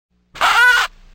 shocked duck
quack, shocked